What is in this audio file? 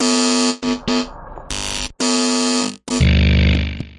DistortionTones 120bpm03 LoopCache AbstractPercussion
Abstract Percussion Loops made from field recorded found sounds
Loops, Abstract, Percussion